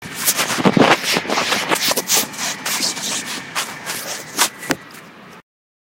MySounds GWAEtoy Squeaky
field, recording, TCR